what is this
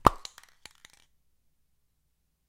Removing a spray paint cap, take 3.